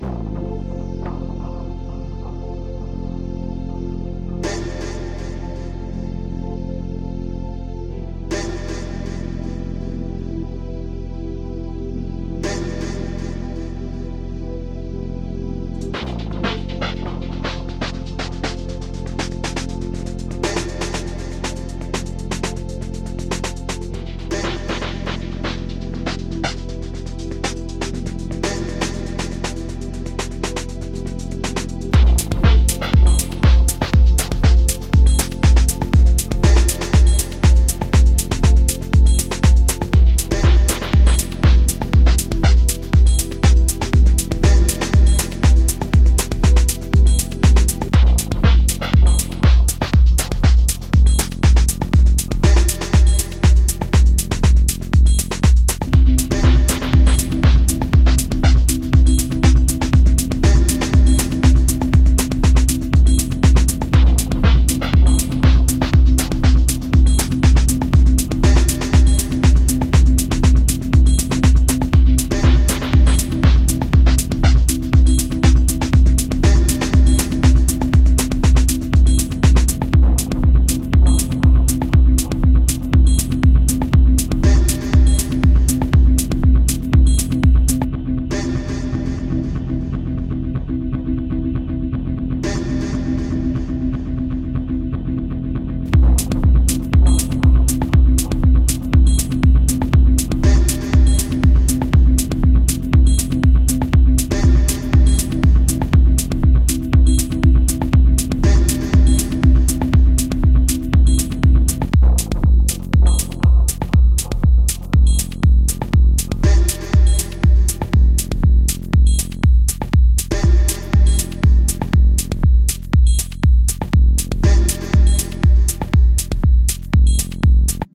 minimal electronic grove Techno loop track
minimal electronic grove _Techno loop track.
synths: Ableton live,Reason
track, techno, electro, kick, sound, sub, bounce, drum, electronic, echo, rave, house, synth, noise, ambient, loop, effect, delay, reverb, minimal, dance, grove, fx, bass